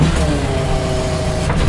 JCB Bucket Rotating 2
Factory, low, Mechanical, Machinery, engine, electric, high, Buzz, machine, medium, Rev, motor, Industrial